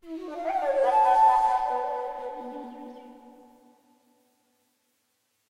A synthesised flute sample - really an attempt to emulate the fantastic native flute samples by freesounder kerri. Of course these synth versions do not compare to kerri's beautiful sounds but do come quite close to a realistic flute sound. The hard parts were getting the vibrato right and mixing a realistic amount of the flute's characteristic " breathiness". From my Emulated instruments sample pack.